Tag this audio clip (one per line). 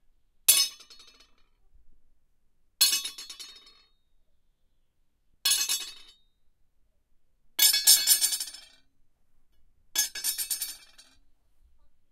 metal
drop
floor
sword
clang
metallic
blade
iron
ting
impact
steel